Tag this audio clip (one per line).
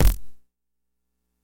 circuitbending
bass-drum
toy
pianola
glitch
bitcrushed
analogue
distorted
drum
kick-drum
percussion